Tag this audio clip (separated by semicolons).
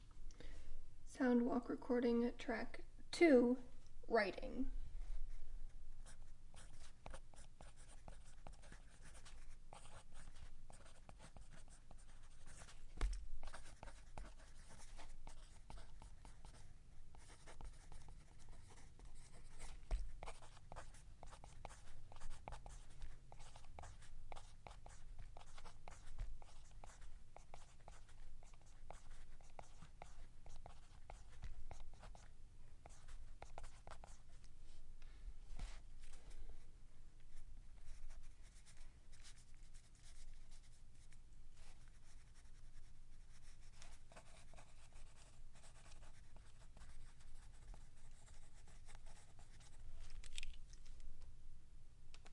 college; notes; paper; pencil; writing